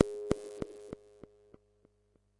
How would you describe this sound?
Q harsh bleep plus click delay at 100 bpm variation 1 - G#2
This is a harsh bleep/synth sound with an added click with a delay on it at 100 bpm. The sound is on the key in the name of the file. It is part of the "Q multi 001: harsh bleep plus click delay at 100 bpm" sample pack which contains in total four variations with each 16 keys sampled of this sound. The variations were created using various filter en envelope settings on my Waldorf Q Rack. If you can crossfade samples in you favourite sampler, then these variations can be used for several velocity layers. Only normalization was applied after recording.
100bpm, multi-sample, synth, waldorf